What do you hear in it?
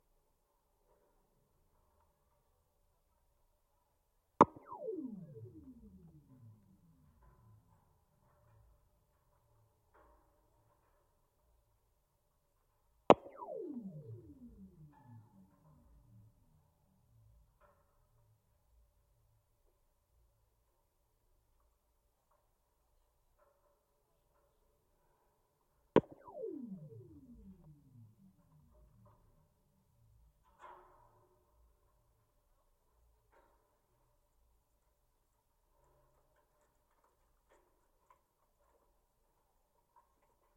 Sundial Bridge 04 cable 12
Contact mic recording of Santiago Calatrava’s Sundial Bridge in Redding, California, USA. Recorded June 24, 2012 using a Sony PCM-D50 recorder with Schertler DYN-E-SET wired mic attached to the cable with putty. This is one of the longer cables (12) being struck repeatedly, yielding a Doppler pulse.
Sony, struck, steel, Schertler, contact-microphone, Sundial-Bridge, contact-mic, Redding, contact, DYN-E-SET, wikiGong, cable, PCM-D50, Calatrava, bridge, metal, field-recording, mic